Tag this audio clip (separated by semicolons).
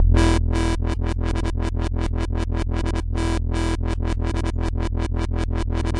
140; 160; bertill; dub; free; massive; synth